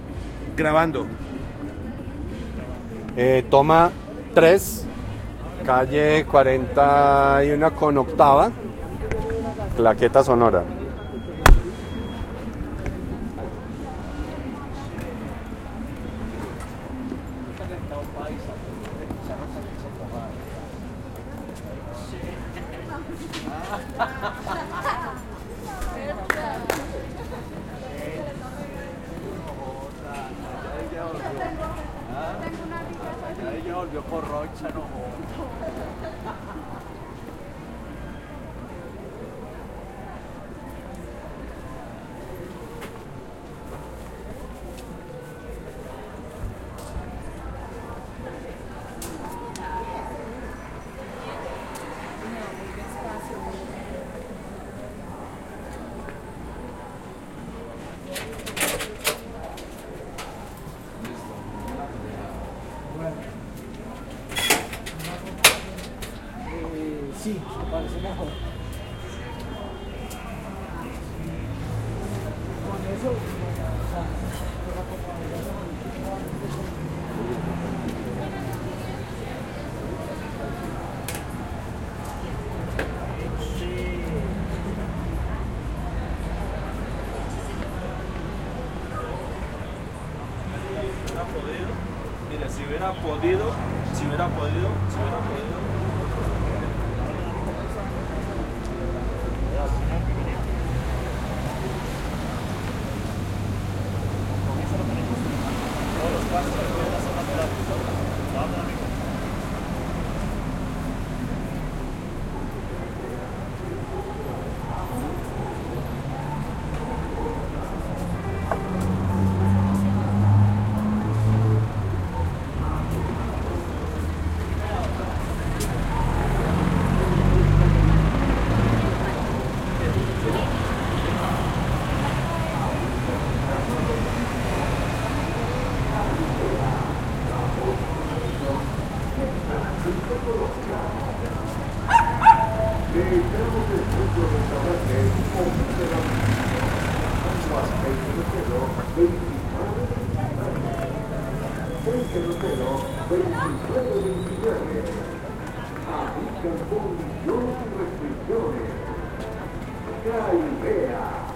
toma-03 roberto cuervo
Field recording of Bogota city in Chapinero locality, around 39 and 42 street, between 7th end 16th avenue.
This is a part of a research called "Information system about sound art in Colombia"